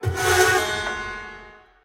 piano harp 4
piano harp band filtered remix
piano-harp, strum, transformation